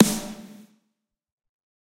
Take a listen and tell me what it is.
Snare Of God Drier 015
drum, drumset, kit, pack, realistic, set, snare